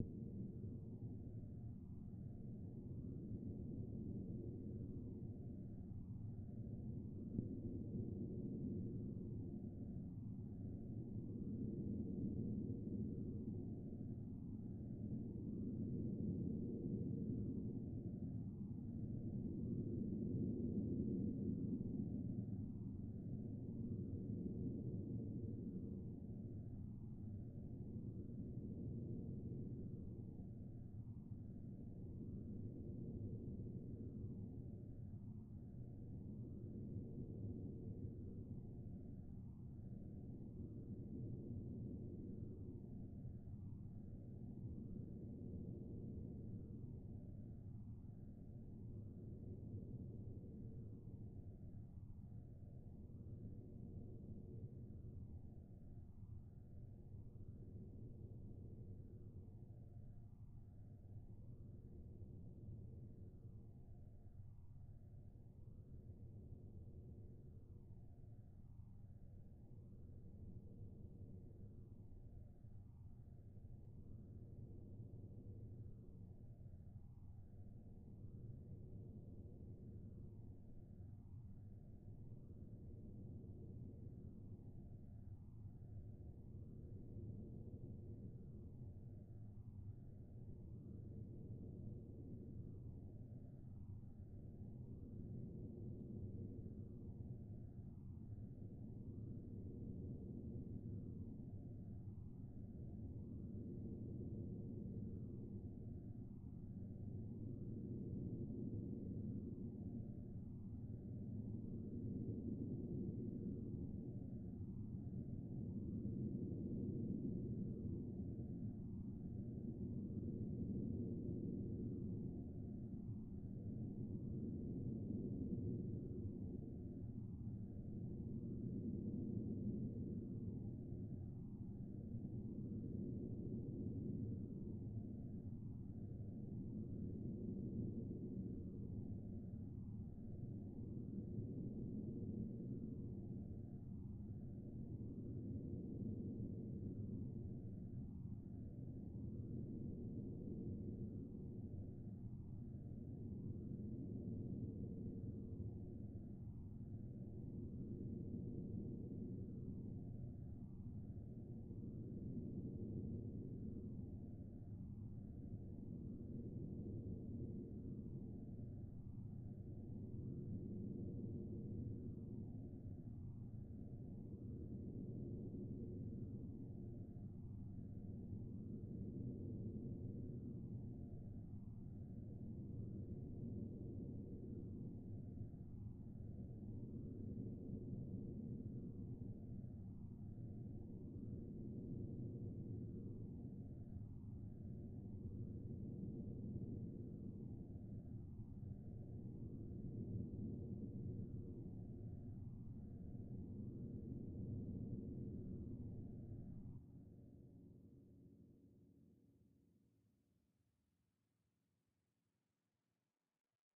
ambient dark windy atmosphere ambience background-sound general-noise white-noise atmospheric